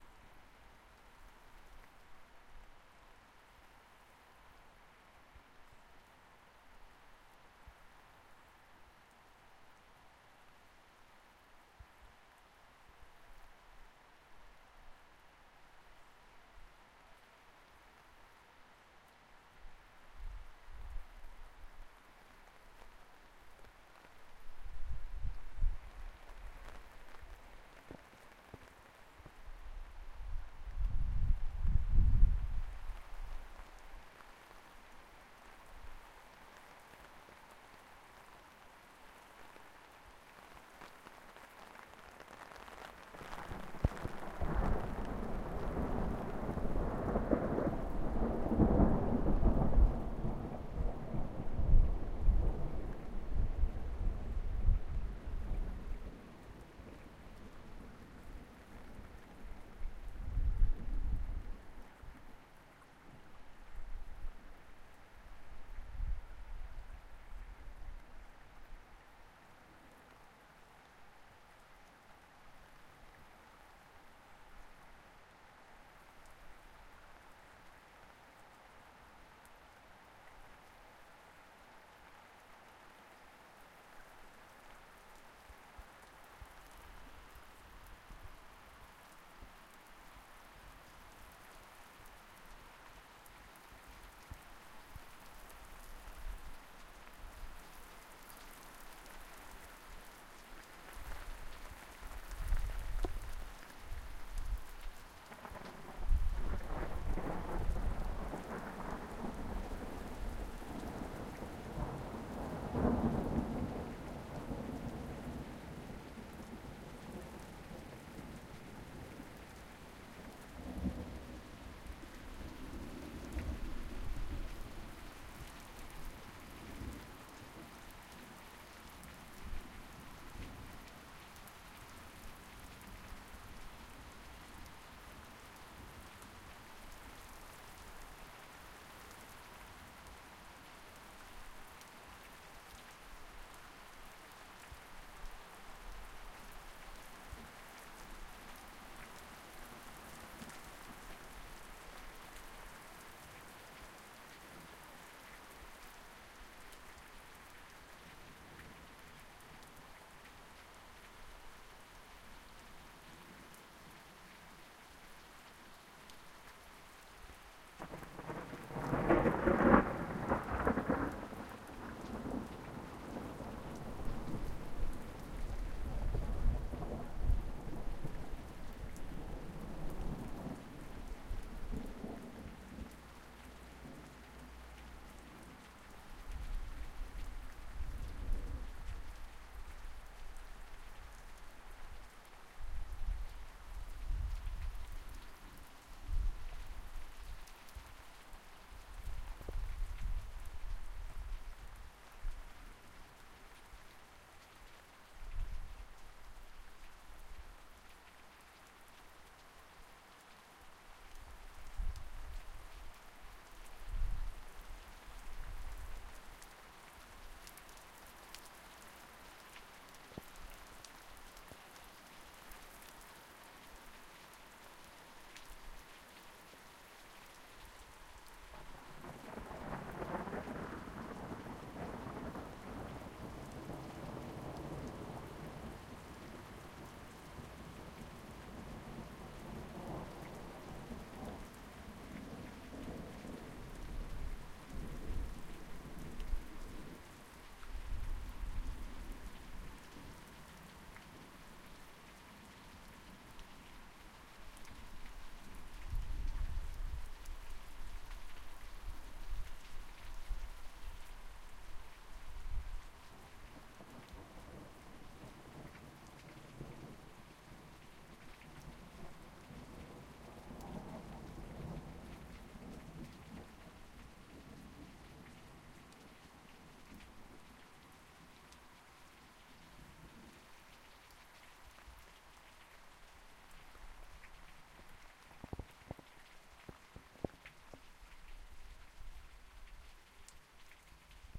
Thunder and rain. Recorded with a Zoom H1.
field-recording, lightning, nature, rain, storm, thunder, thunderclap, thunder-storm, thunderstorm, weather